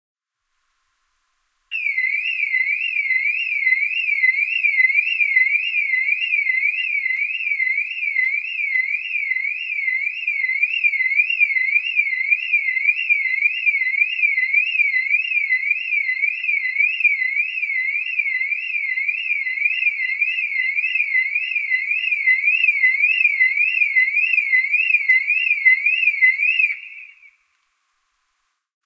Audio of a distant car alarm going off around 3AM - convenient given that 3AM is one of the quietest hours to record during. Reduced irrelevant frequencies below 1kHz. The recorder was approximately 75 meters from the car.
An example of how you might credit is by putting this in the description/credits:
The sound was recorded using a "Zoom H6 (XY) recorder" on 16th March 2018.